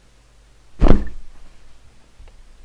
Some fight sounds I made...
kick, fist, combat, fighting, fight, punch, leg, hit